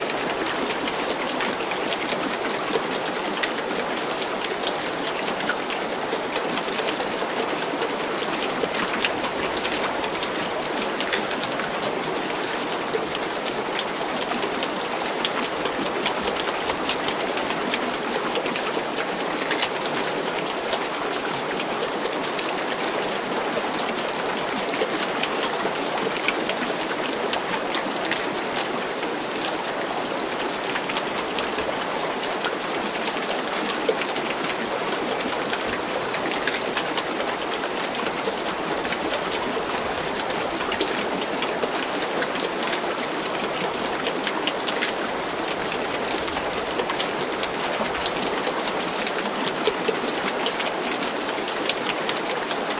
Old Water Wheel
I´ve recorded this sound produced by a large water wheel of 4 meters diameter, in the historical part of Augsburg Bavaria, part of town where early industry and work shops used water energy for different purposes. This water wheel does not drive any gear any more, it is just for to give an impression of that old water driven industry. The wheel is driven by one of many former industry channels, small rivers through that part of town.
523, a, Condenser, connection, E, externel, field-recording, H1, microphone, pocket, recorder, Stereo, Superlux, using, Zoom